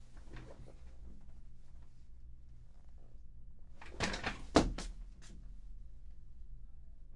jump from a chair with papers